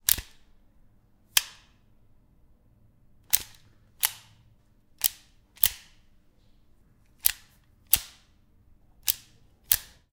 Pistole - Schlitten schieben
Moving the slide of a pistol
field-recording, handgun, moving, pistol, slide